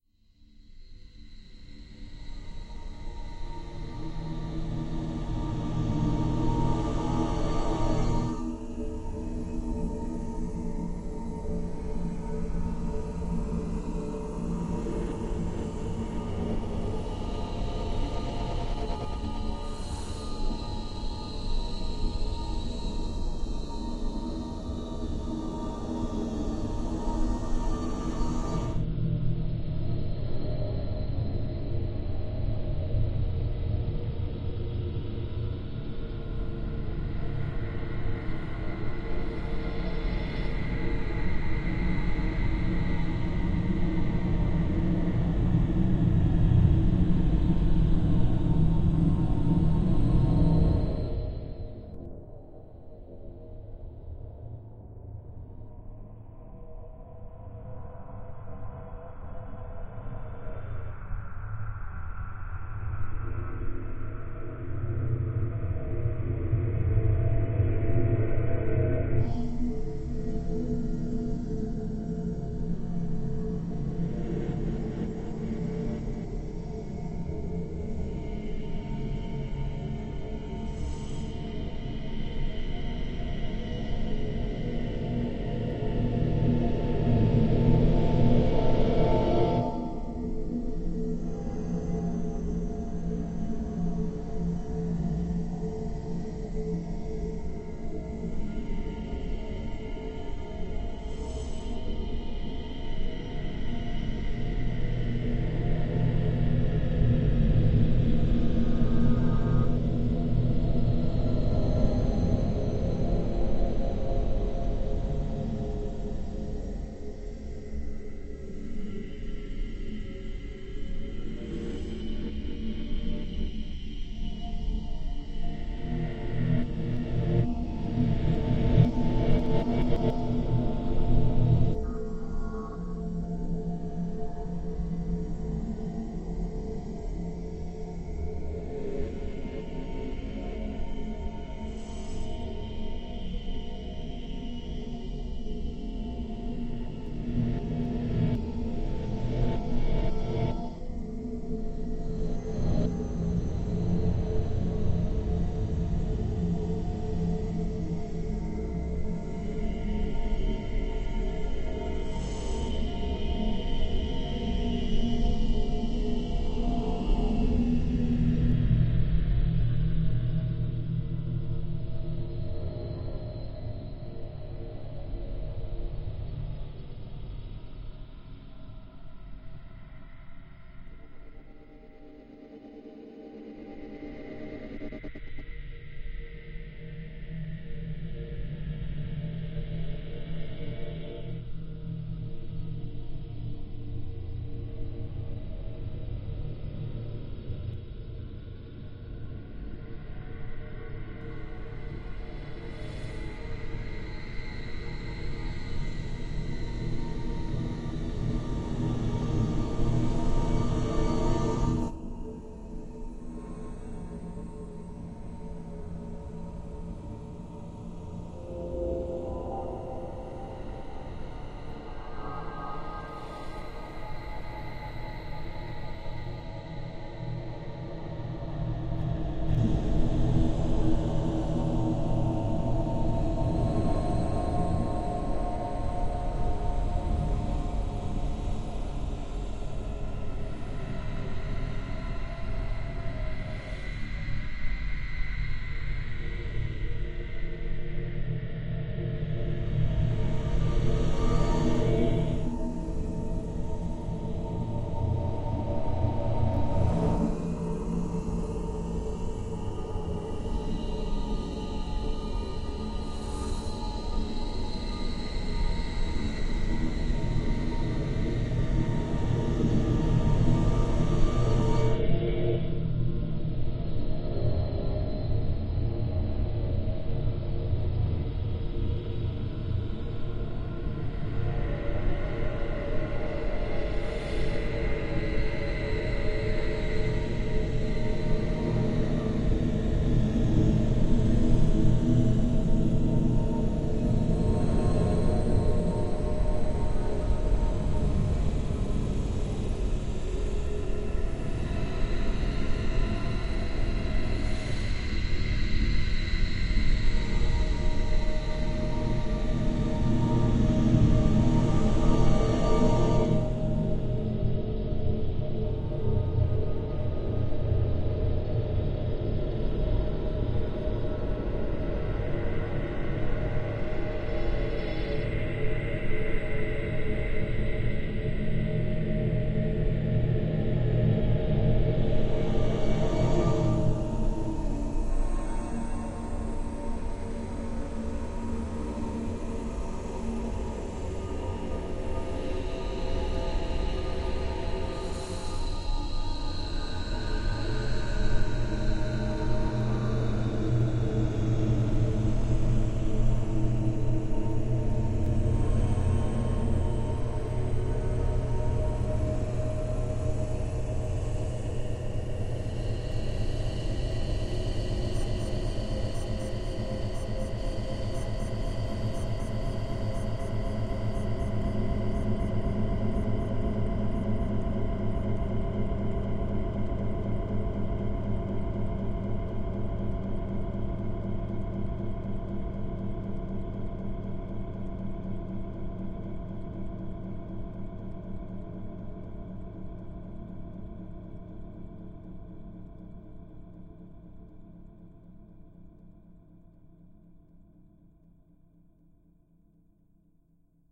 Soundtrack in progress intended for short psychological thriller concerning lack of communication.
Sounds pulled from recordings made in 1985 in home studio, various analog synths and strings. Adjusted and layered for confusion.
Audacity, 2013 Macbook Pro.
bizarre, confusion, dark, dread, dream, dreamlike, evil, fear, horror, huge, jolting, psychedelic, Strange, surprising, time, unearthly, warp, weird